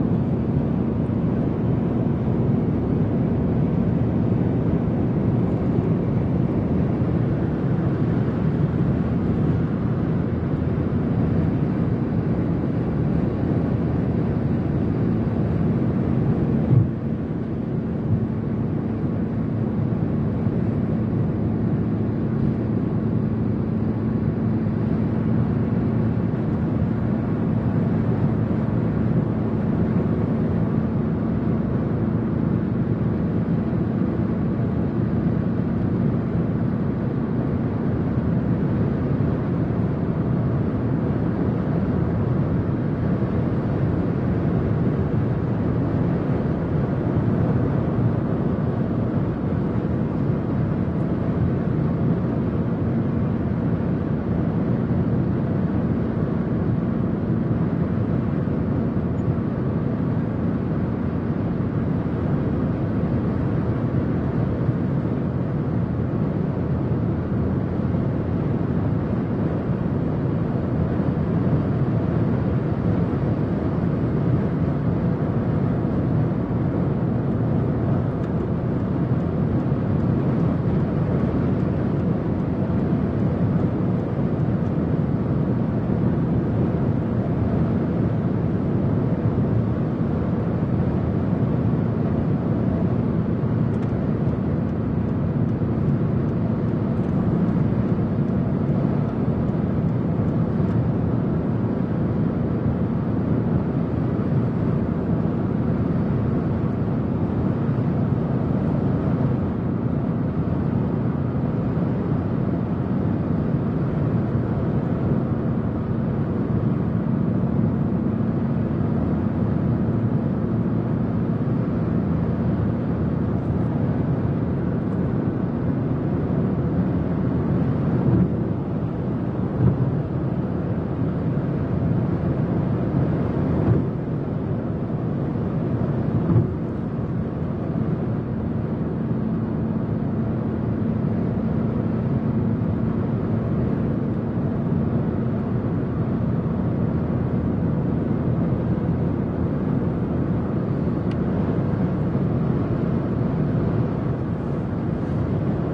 020-Highway car interior
car, closed, windows, Highway